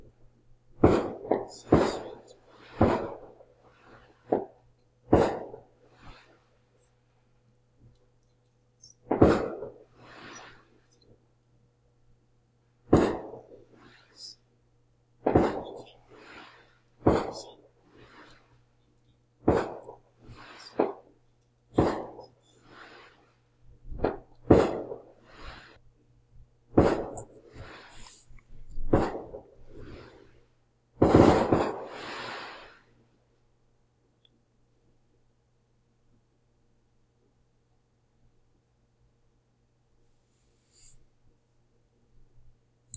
Some fireworks sounding through my window. I recorded this from my desk, since there wasn't time to walk outside and record it.
atmosphere, field-recording